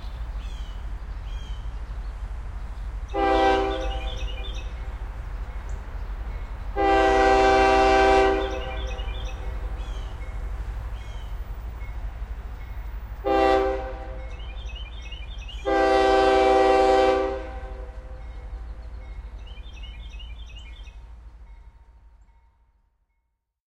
Locomotive 1 Distant horn
Diesel Locomotive Horn from distance, partially
obscured by bird and city sounds. This sample pack is numbered
chronologically as edited from the original recording: Engine
approaches from left with recording #1 and exits to the right with
recording #5. Recordings are of a Diesel locomotive approaching and
mating with the rear of a freight train outside of a wherehouse in
Austin, Tx. Rode NT4 mic into Sound Devices MixPre, recorded at 16bit 44.1 with Sony Hi-Md. Edited In Cubase.